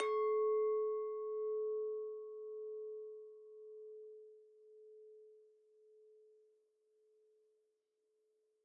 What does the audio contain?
Just listen to the beautiful pure sounds of those glasses :3
clink, crystal, edel, glas, glass, glassy, pure, soft, wein, weinglas, wine, wineglass